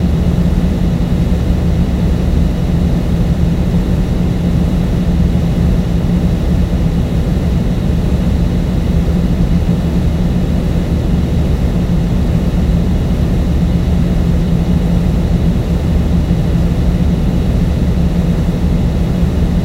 Loud Machinery Ambiance
The sound of noisy, industrial machinery; a seamless loopable soundtrack suitable for industrial or factory environments with big machines. Made in Audacity.